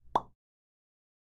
Chicle explotando
exploting gum sound